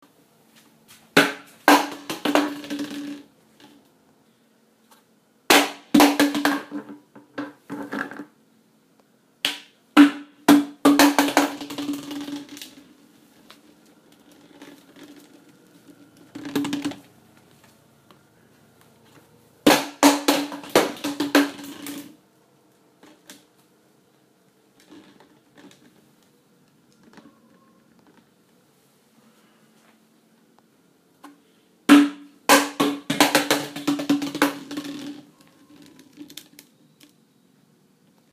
Drop Bounce Plastic Bottle
This is a plastic bottle dropping and bouncing